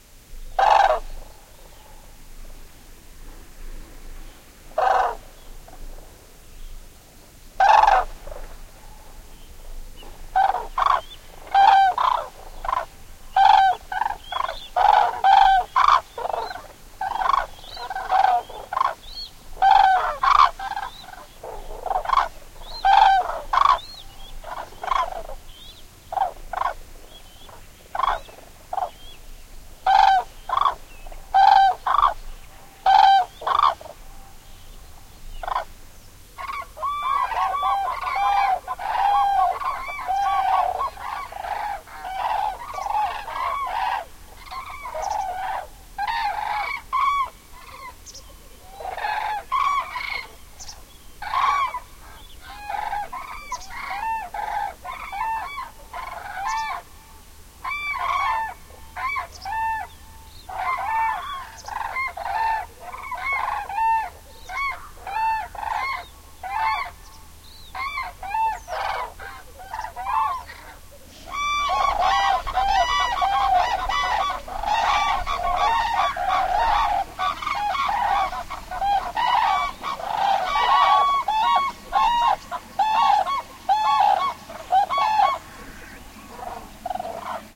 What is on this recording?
A flock of Gray Cranes (Common Crane) arrived on the field at dawn.
Russia, Taldom, September 2014.
DPA 4060 Stereo Pair, Sonosax SX-R4
Gently denoising and compression applied.